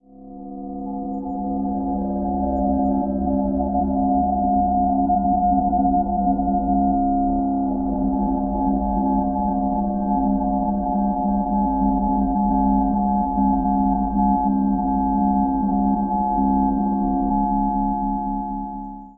A sound created in Giorgio Sancristoforo's program Berna, which emulates an electro-acoustic music studio of the 1950s. Subsequently processed and time-stretched approximately 1000% in BIAS Peak.
electronic, time-stretched